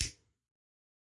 A lighter being striken.
burn, lightbox, ignite, clipper, fire, lighter, ignition, spark